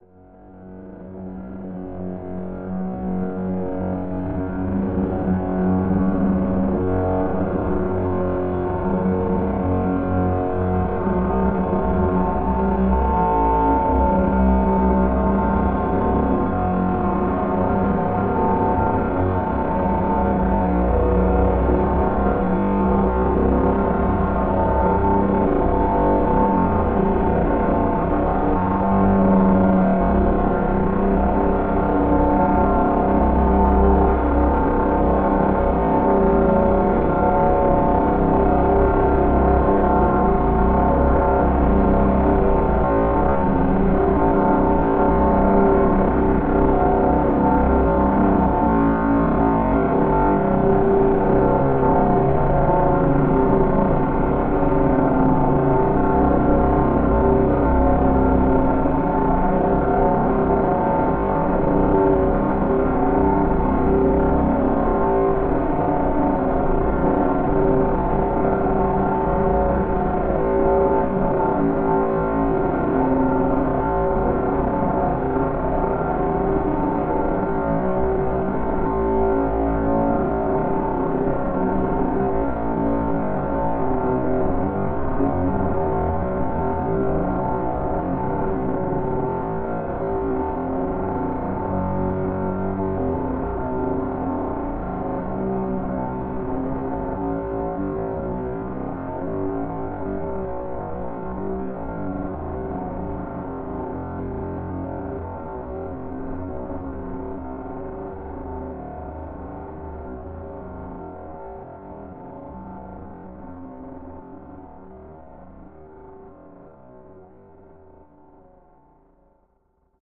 A drone created from processed sawtooth waveforms.
buzzing, drone, harmonic, metallic, resonant